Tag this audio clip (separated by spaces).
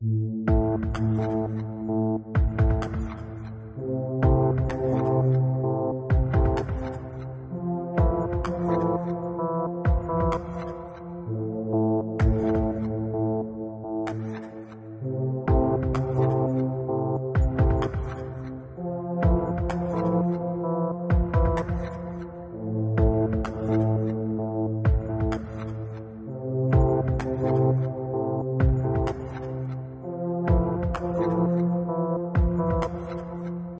80s dark evil future loop synth